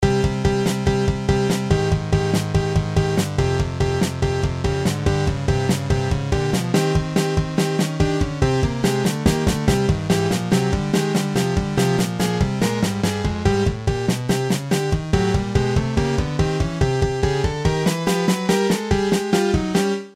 random boss fight music
bossfight, free, le-hehe